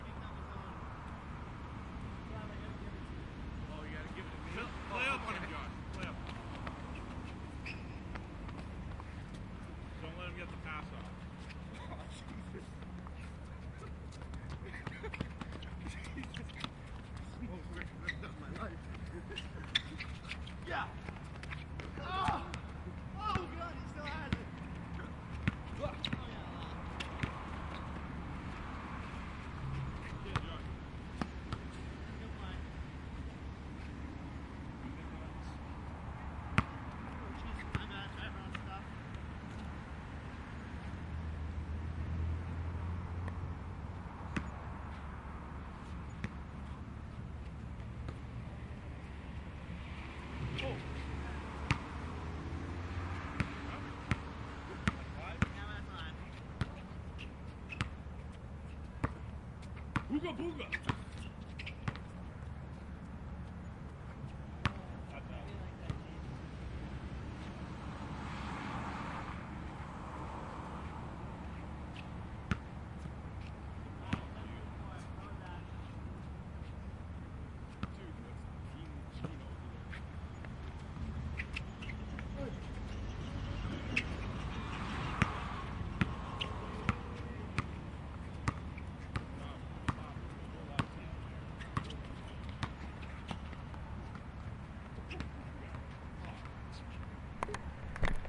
night basketball
basketball court at night
bounce, field-recording, traffic